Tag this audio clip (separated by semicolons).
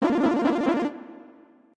8-bit; fx; electronic; digital; lo-fi; sound-design; video-game; sfx; sci-fi; game; soundeffect; mushroom; power-up; glitch; game-sound; abstract